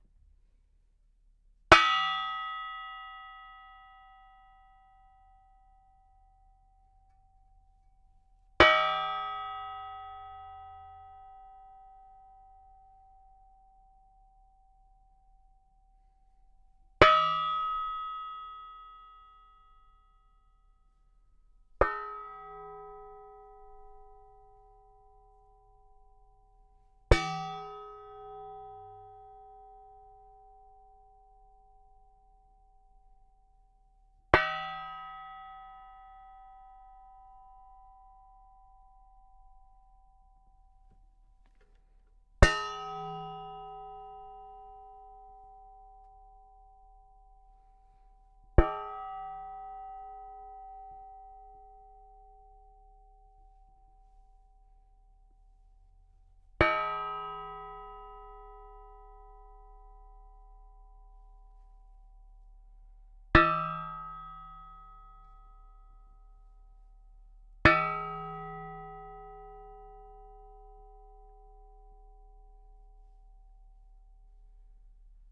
Metallic tray hits 2
Hitting another metallic tray several times. A more sturdy/dry sound with less hang time. The sound is reminiscent of a thick bell or gong.
Mic: Schoeps C-MIT 5
gongs, clangs, dry, metallic